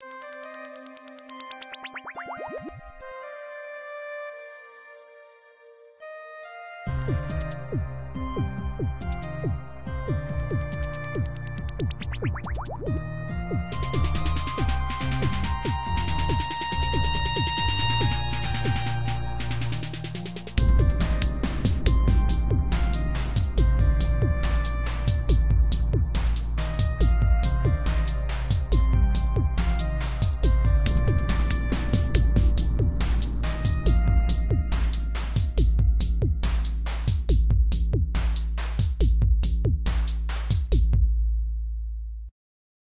A fragment of music. You can use if you want.
Bubble, Music, Water